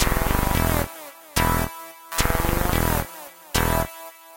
Elf Dance
I uncovered this loop from the "soundtrack for kids" folder on my hd. If your compositions for kids needs a bit of rush of excitement, then use this.
loop, electronica, synth